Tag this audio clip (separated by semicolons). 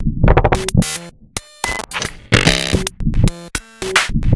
Percussion; Abstract; Loops